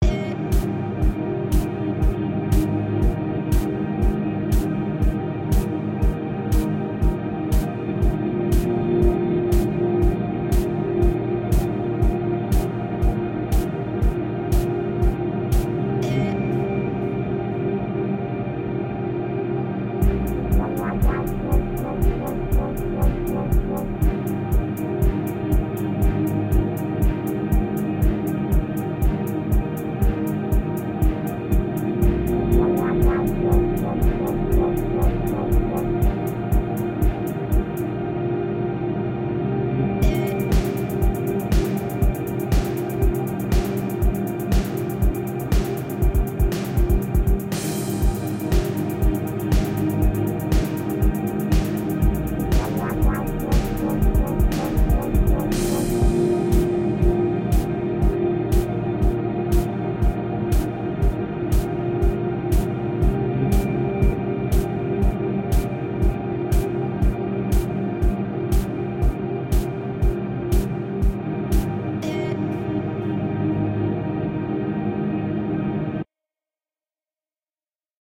A slightly uplifting ambient loop inspired by Mirror's Edge